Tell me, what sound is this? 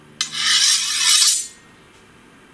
Unsheathing the sword
scrape, metal, combat, unsheath, shing, sword, katana, scabbard, knife, sheath, weapon, blade, draw, unsheathing